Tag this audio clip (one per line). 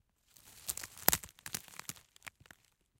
branch
branches
break
breaking
crack
cracking
crunch
dry
High
leaf
leaves
noise
rustle
snap
snapping
stick
sticks
tree
twig
twigs
wood